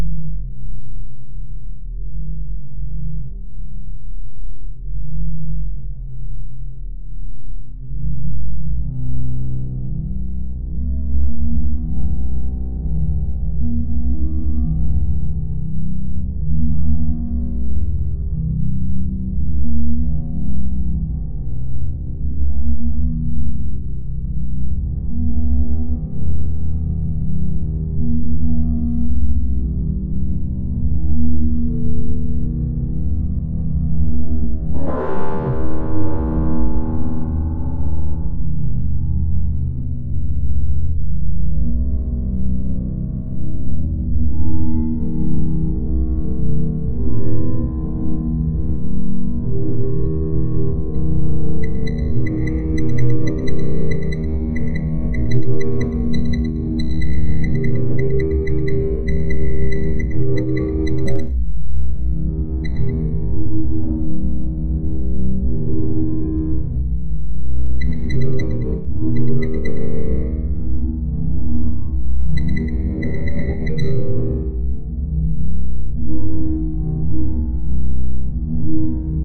Evolvo Orb
Sound experiment: Like a spinning, pulsing ball.